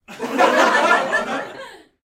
Recorded inside with a group of about 15 people.